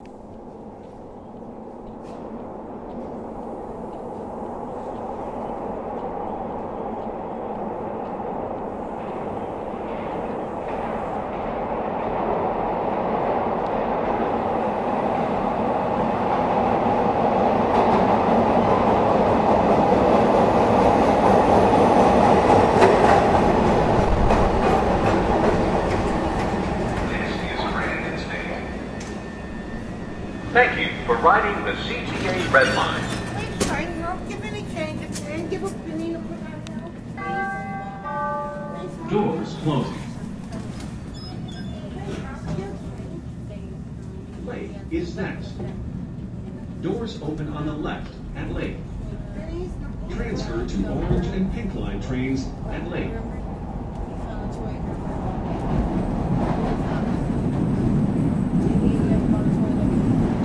TrainArrives GrandState
Lady getting off of train, high pitched voice, CTA voice announcing grand and state stop. Thank you for riding the CTA red line. Doors closing. Lake is next. Transfer to Orange and Pink line trains at Lake.
CTA, lake, red-line, state, train, grand, transfer, clark, blue-line, chicago